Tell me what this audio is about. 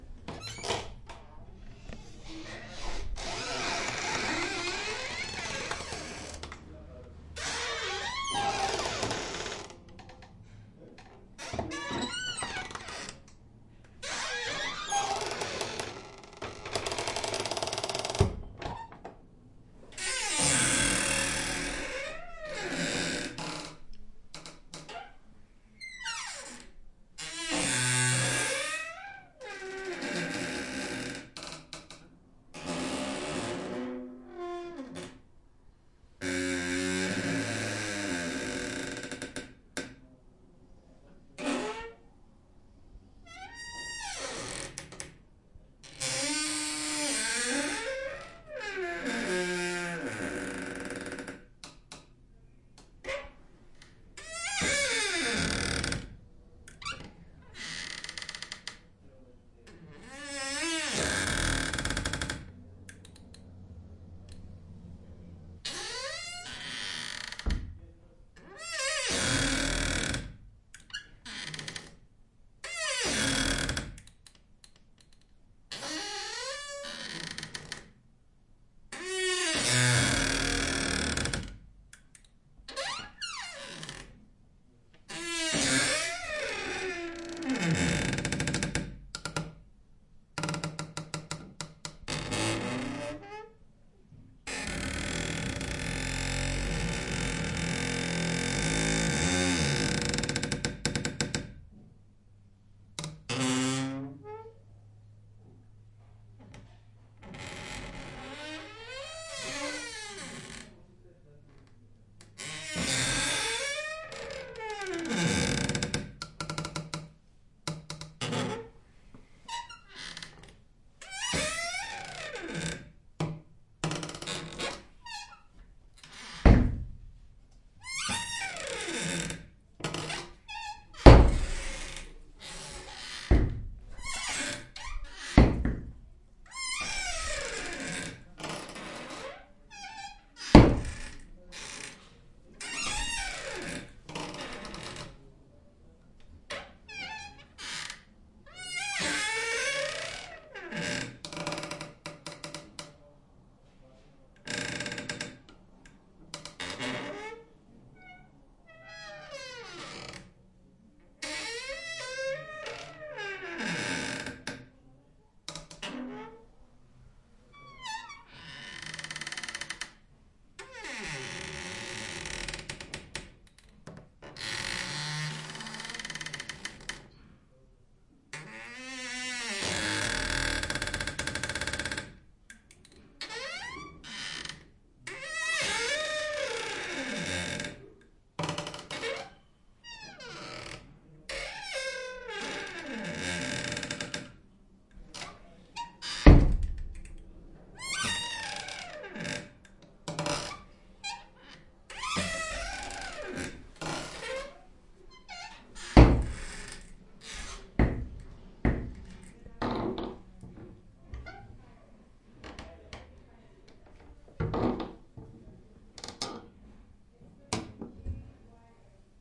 wood door closet cabinet armoir open close creak various slow fast ship hull list and door hit +bg voices o well
armoir, cabinet, close, closet, creak, door, fast, hit, hull, list, open, ship, slow, various, wood